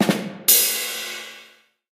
A comedy rimshot, used for the punchline of a joke... or maybe for sarcasm. Processed from multiple recordings, strung together with reverb.